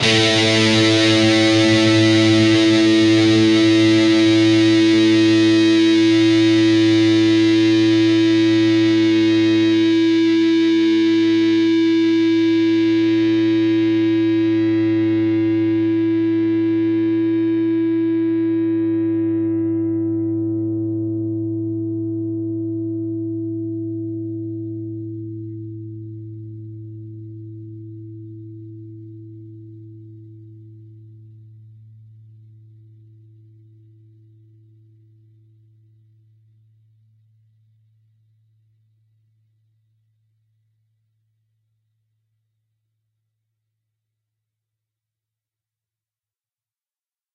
A (5th) string open, and the D (4th) string 7th fret. Up strum.